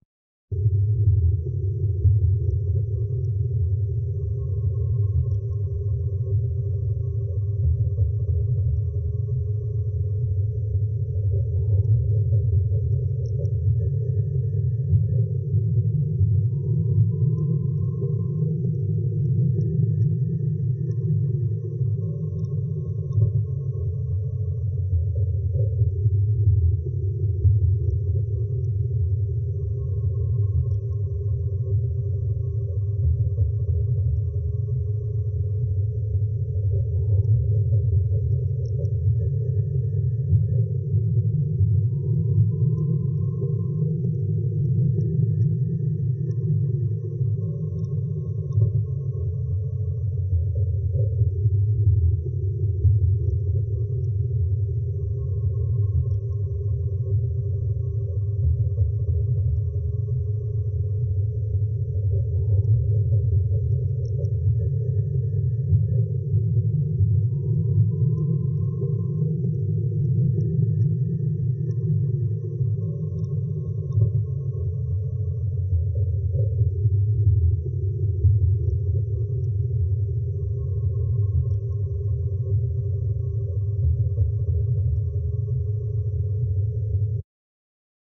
ambient, tense soundscapes and rumbles based on ambient/soundfield microphone recording inside a running train.